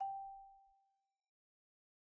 Sample Information:
Instrument: Marimba
Technique: Hit (Standard Mallets)
Dynamic: mf
Note: G4 (MIDI Note 67)
RR Nr.: 1
Mic Pos.: Main/Mids
Sampled hit of a marimba in a concert hall, using a stereo pair of Rode NT1-A's used as mid mics.
hit, idiophone, instrument, mallet, marimba, one-shot, orchestra, organic, percs, percussion, pitched-percussion, sample, wood